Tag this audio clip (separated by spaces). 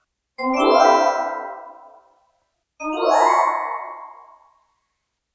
fairies fantasy fairy talk play playing talking